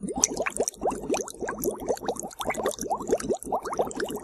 Sounds like boiling chemicals. Recorded by blowing air into water.
loop, boiling, chemistry